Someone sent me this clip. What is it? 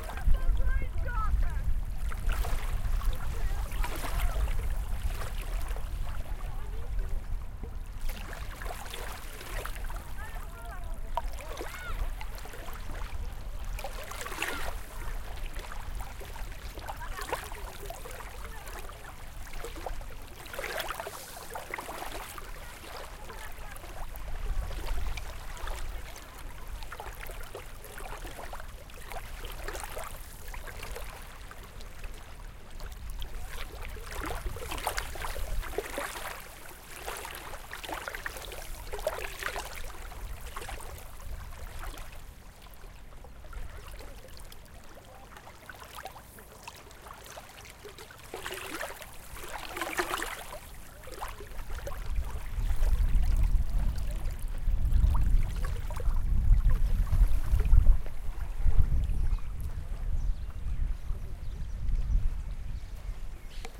Guaíba River - Brazil
Sounds recorded at the edge of Guaíba River, Porto Alegre (Brazil). It's a very calm river, so the sound is quiet, although you can hear some people speaking and taking a swim in the background.